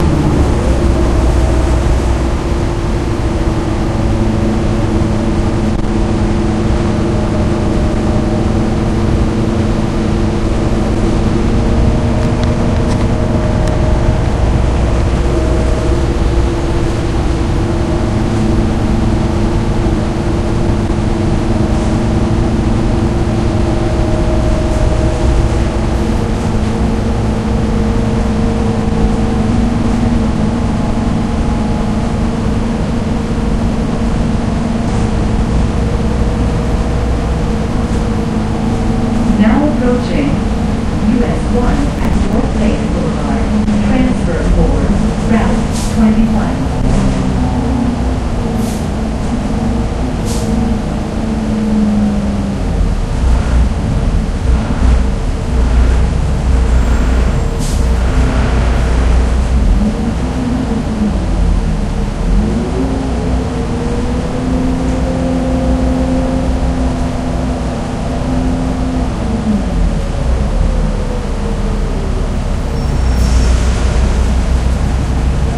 One of a series of recordings made on a bus in florida. Various settings of high and lowpass filter, mic position, and gain setting on my Olympus DS-40. Converted, edited, with Wavosaur. Some files were clipped and repaired with relife VST. Some were not.
bus, ambience, engine, transportation, field-recording, interior